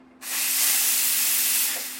expresso vapor corto 3
short, vapor